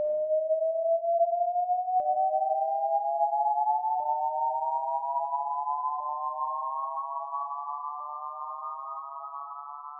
LE LOUVIER Lorine 2015 2016 WhistleAlarm
This sound is a synthetical material, create from audacity. I frist create a whistle of 440hz. Then I added some echo and reverbation, size of the room 50%. And to finish, I added a long fade out.
Typologie:
V .
Morphologie:
1) Masse : son seul complexe.
2) Timbre harmonique: brillant.
3) Grain: lisse.
4) Allure: pas de vibrato.
5) Dynamique: l’attaque est abrupte, le son se termine doucement et graduellement.
6) Profil mélodique: Variation serpentine.
7) Profil de masse: site.